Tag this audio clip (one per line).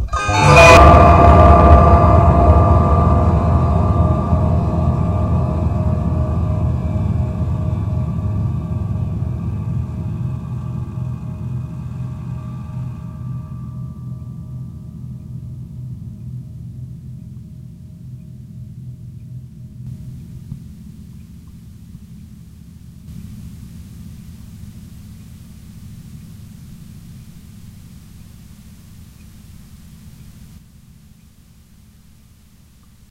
frighten
horrorstinger